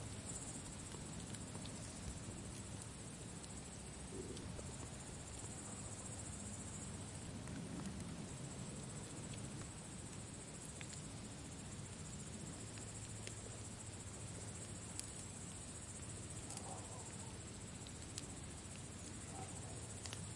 Campfire burns on a summer night near Vyshny Volochek, Russia